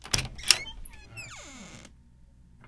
Door Opening and Squeaking
Sound of a door opening with some squeak and the sound of the handle being opened. Needs some EQ, but this is raw file I recorded
squeaky, handle, open